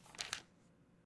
Singular Page Turn
A single page in a Bible being turned
book, page, pages, paper, read, reading, turn